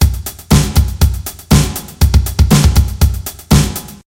Hybrid Drum Groove
drum, hybrid